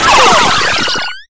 SFX Powerup 25
8-bit retro chipsound chip 8bit chiptune powerup video-game
8-bit, chip, powerup